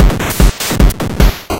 150bpm.PCMCore Chipbreak 4
chiptune, cpu, hi, pcm, stuff, videogame, wellhellyeahman